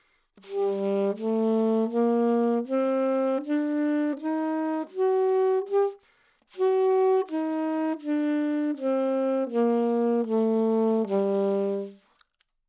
Sax Alto - G minor
Part of the Good-sounds dataset of monophonic instrumental sounds.
instrument::sax_alto
note::G
good-sounds-id::6811
mode::natural minor